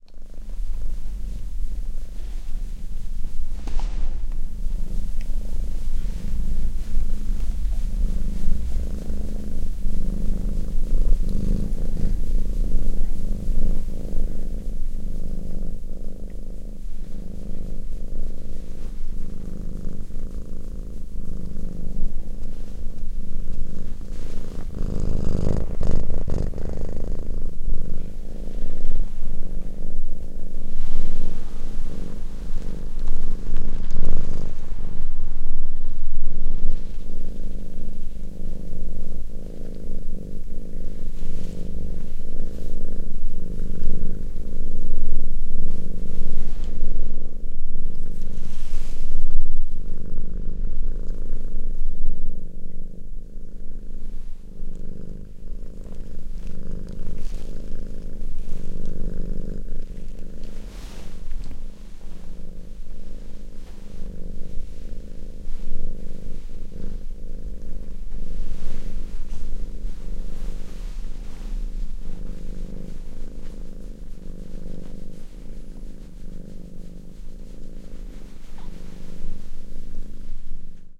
cat purring in bed
Field recording of a purring cat in a bed, so some rusteling of the sheets is expected.
Recorded with a binaural Mic, so it sounds best with headphones
bed, binaural, cat, purr, purring, relax